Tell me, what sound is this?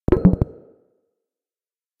Confirmation Sound
UI sound effect. On an ongoing basis more will be added here
And I'll batch upload here every so often.
SFX, UI, Sound, Confirmation, Third-Octave